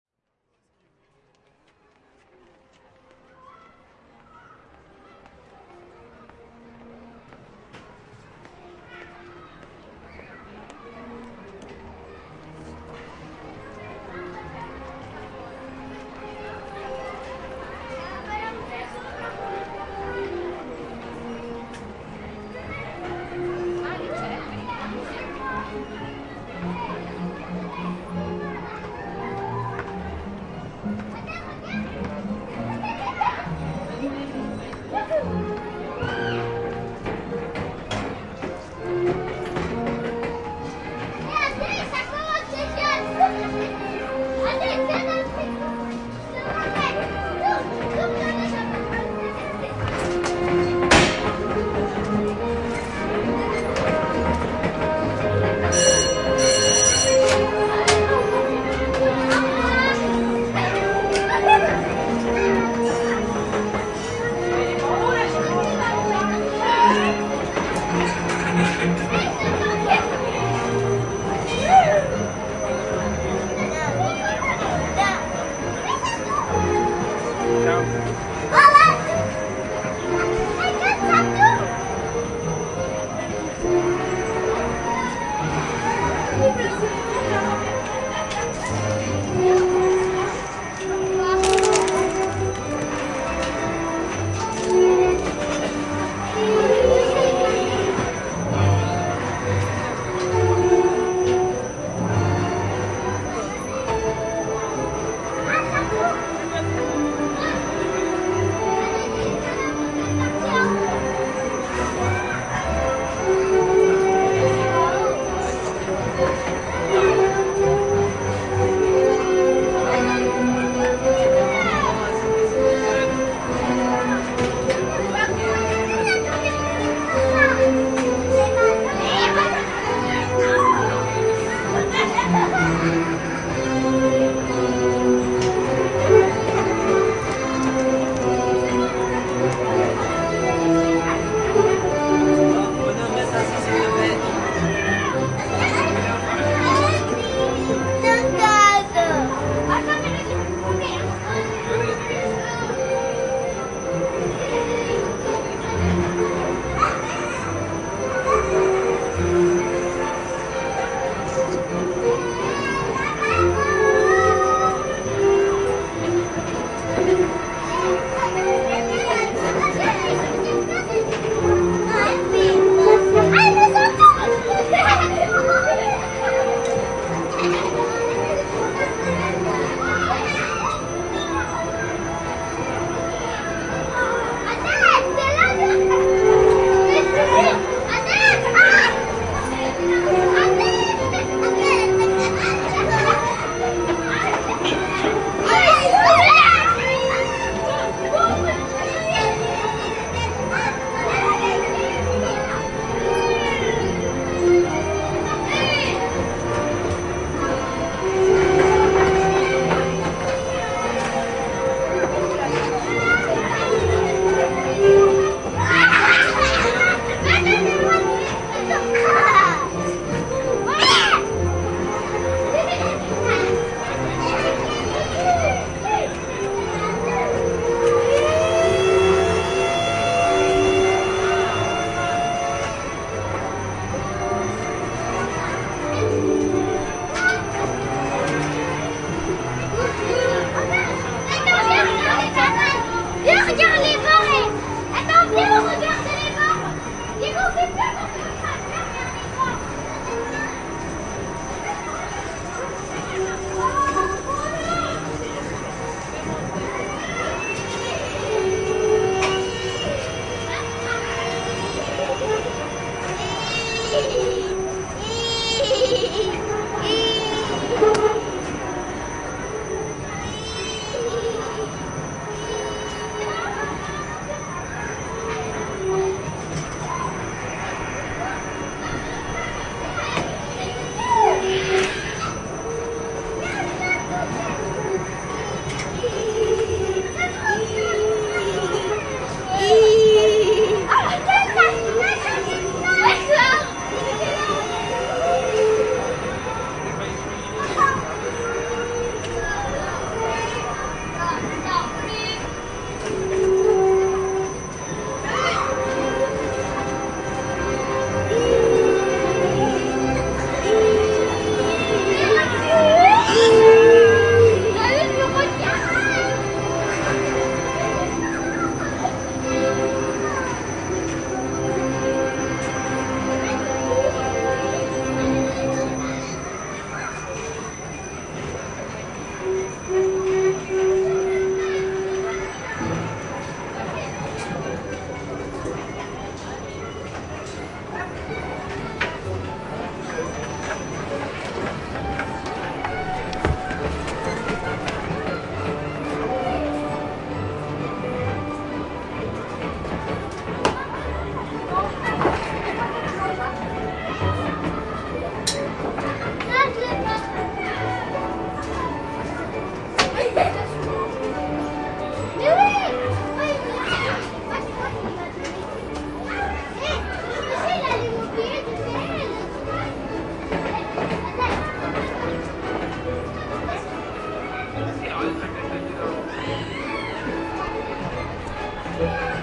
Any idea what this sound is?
An outdoor recording in La Villette park with zoom 4